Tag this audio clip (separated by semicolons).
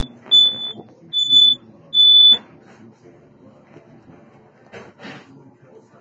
smoke; detector